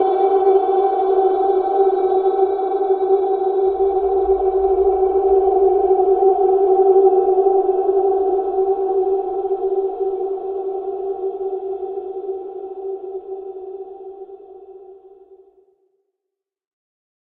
2oct3over2etherealg2
Based on a root tuning of C256hz.5th up, granular time stretched
granular, santoor, timestretch